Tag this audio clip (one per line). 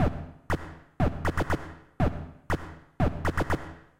120-bpm
loop
noise
noise-music
NoizDumpster
percussion
rhythm
synth-drums
TheLowerRhythm
TLR
VST